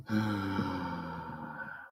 sigh collection air breath respire